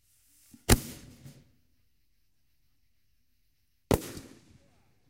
Fireworks recorded using a combination of Tascam DR-05 onboard mics and Tascam DR-60 using a stereo pair of lavalier mics and a Sennheiser MD421. I removed some voices with Izotope RX 5, then added some low punch and high crispness with EQ.
bang, crackle, fireworks, pop, whiz